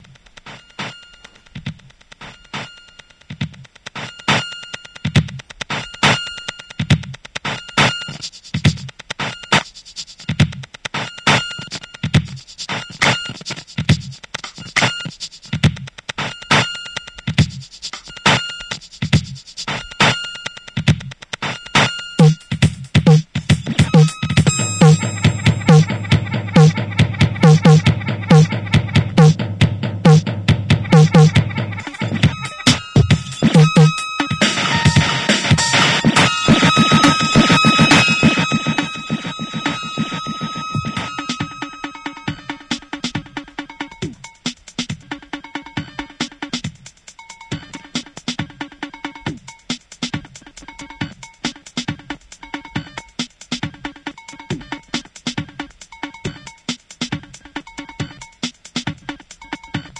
Yamaha DD 20 (Glitch 2
Some random samples I managed to pull off of my Yamaha DD-20. You can trim and slice them as you would like.